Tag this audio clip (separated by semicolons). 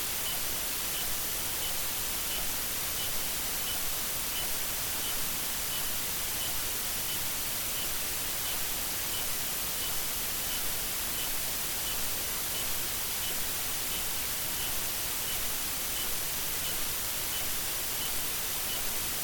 ambient; drone; electric; electricity; experimental; pad